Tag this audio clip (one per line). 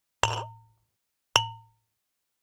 empty
glass
hollow